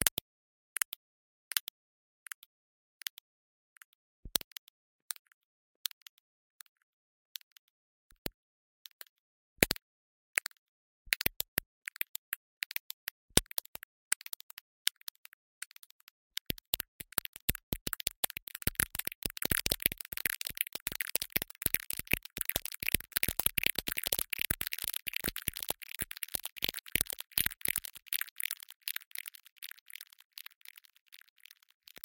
Automat:Pres24:DigitalFire
some playing in the freeware synth automat with preset 24 "Digital Fire"
processed, fire, glitch, synth, digital, automat, preset